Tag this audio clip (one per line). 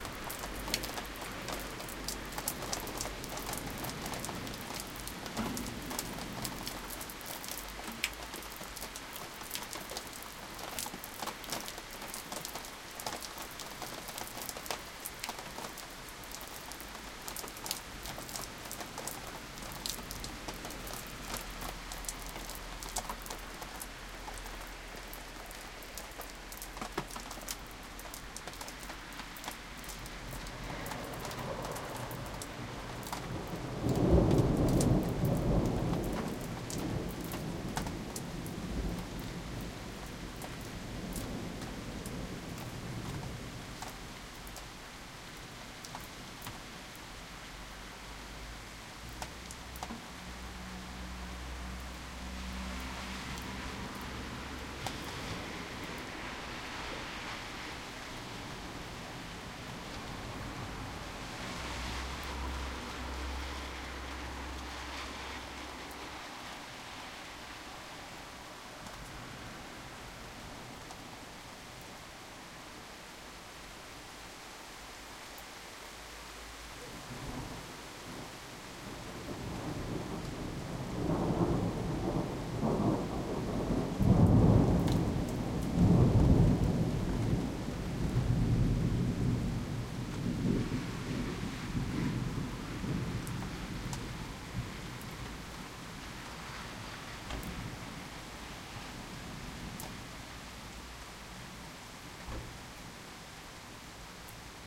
cars
city
drops
rain
thunder
weather